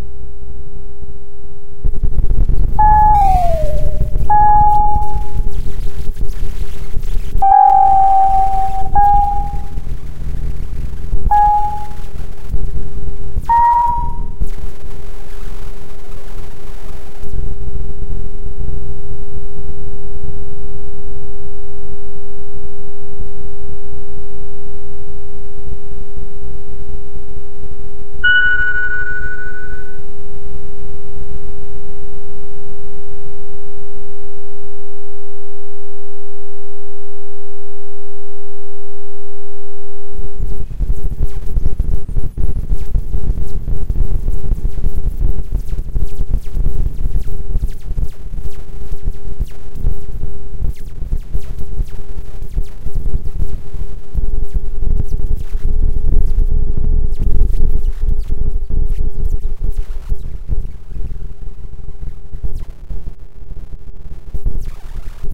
tiny interference unwanted accidental sound from recording of rain amplified and processed
melbourne, rain, field, technology, dystopia, glitch, recording, interference